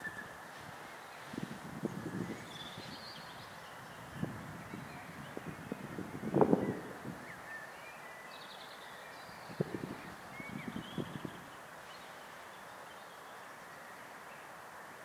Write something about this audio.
Birds & Wind
I recorded this sound via a portable recorder whilst walking over Hilly Fields, Colchester. You can hear birds singing and you can hear wind hitting the microphone.